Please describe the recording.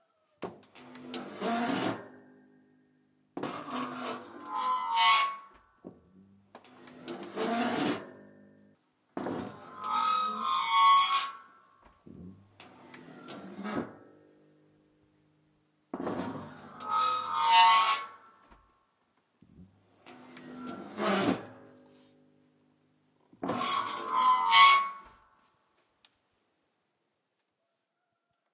creaking dishwasher 3
My dishwasher's door is really creaking... Sounded very interesting to me.
Creak, squeak, Creaking